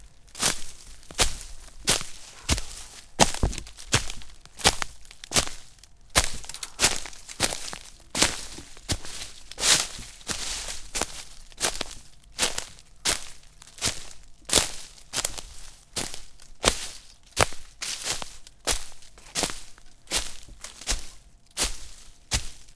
Stomping through the forest. Breaking snapping twigs. rustling leaves